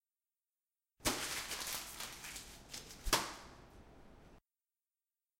This sound shows a person who is creasing a paper and then it is thrown in a paper basket.